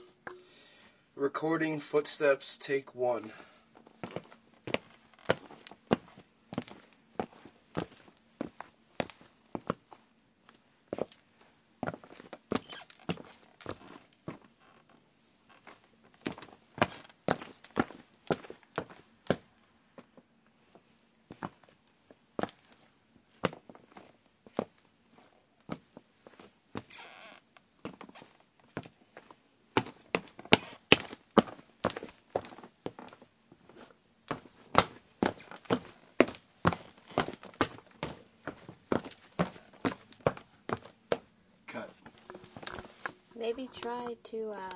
Footsteps on Hard Floor
Someone walking with hard shoes on a hard floor.